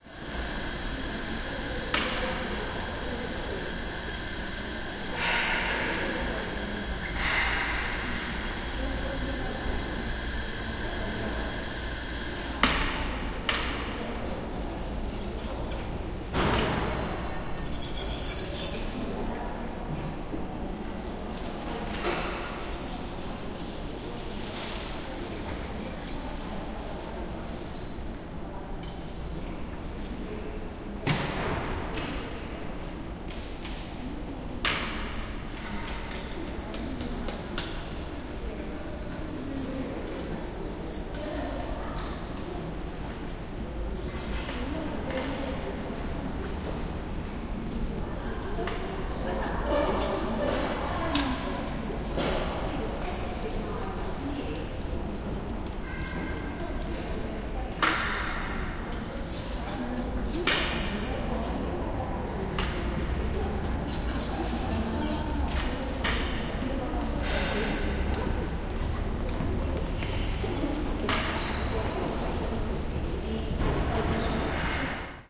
In the Art Space in the Blue Square. People in the background. People cleaning the floor.
20120517